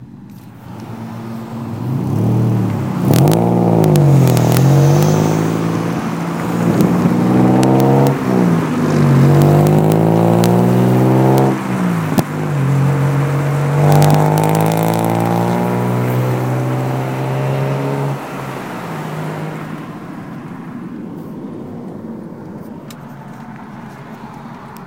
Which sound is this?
Driving by Noisy Car
Driving next to a noisy car. 3:00 PM, February 22.
Road, Busy, Street, Muffler, Speed, Driving, Car, Loud, Drive, Vehicle, Field-Recording, Noise